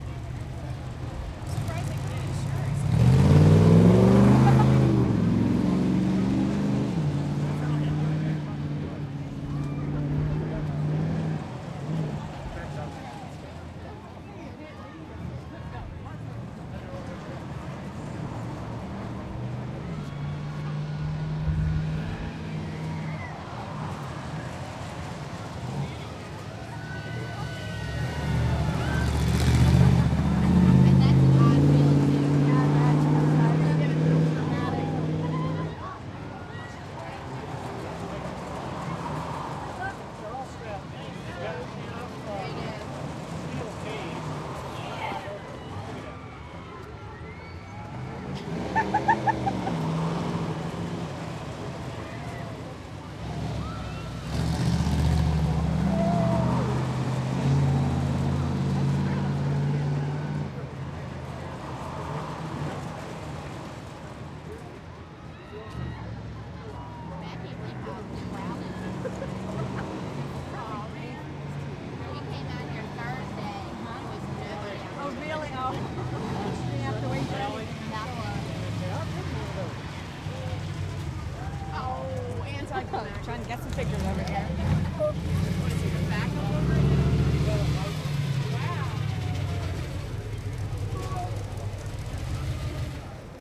Sound from within crowd watching the "Ride in a Monster Truck" exhibition, with sparse dialogue as truck moves around, roaring and fading in and out.